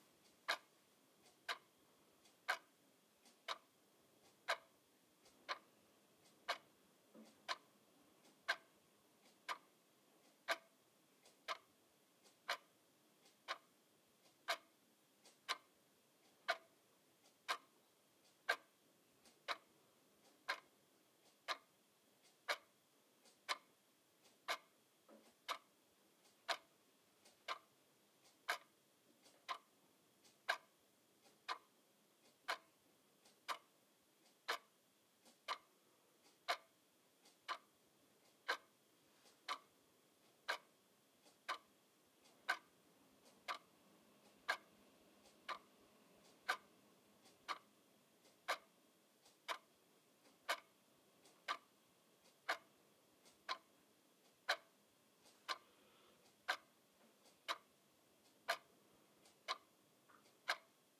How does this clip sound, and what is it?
This is my wall clock ticking in the TV room. The mic has been placed 1 cm apart from the clock. It's raining outside the house.
Recording machine Zoom F4
Microphone 1 Line-audio OM1
software Wavelab
plug-in Steinberg StudioEQ
audio, clock, F4, field-recording, line, line-audio, OM1, tac, tic, tick, ticking, ticks, tick-tock, tic-tac, time, tock, wall, wall-clock, wallclock, Wavelab, Zoom